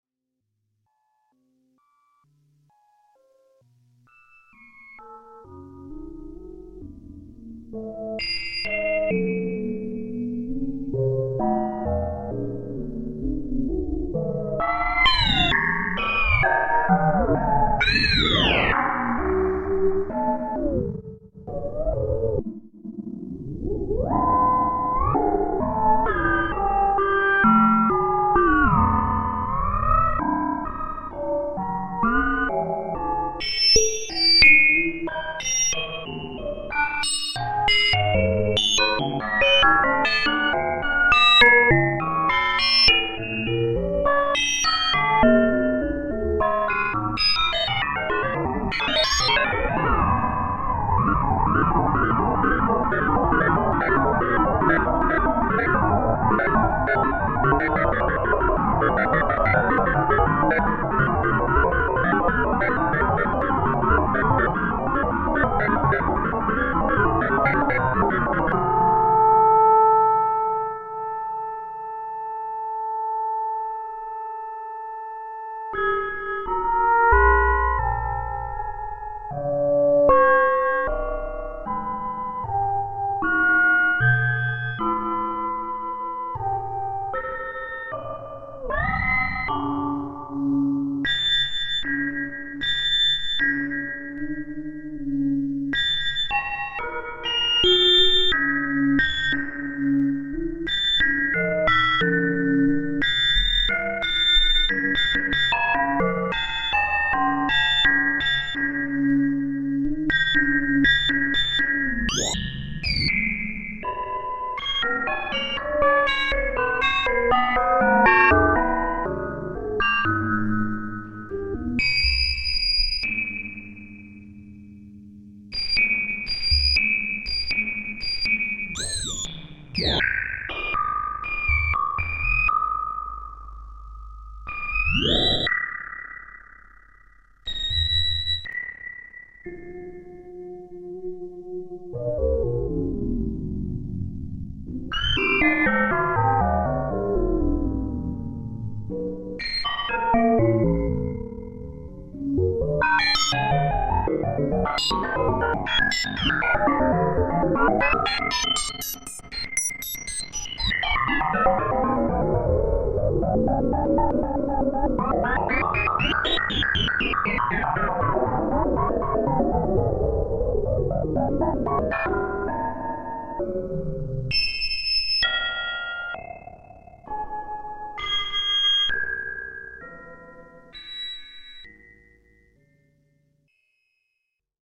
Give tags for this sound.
analog; synth; demo; S; analogue; patch; ringmod; H; electronic; random; spring; modular; reverb; synthesizer; sample-and-hold; ringmodulation; raw

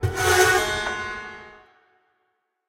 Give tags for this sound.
abuse; dry; ice; piano; scratch; screech; torture

